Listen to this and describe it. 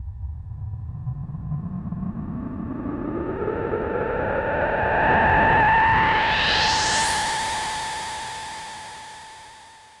Flo x Fx tetra i
made on a Dave Smith Tetr4 recorded thru a SSL channel strip
analog, dave, fx, smith, ssl, synth, tetr4, tetra